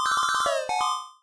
arcade hurry up
Audio cue to indicate time running out.
arcade, soundeffect, sfx, toy